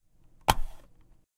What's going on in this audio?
The sound that produce move a mouse.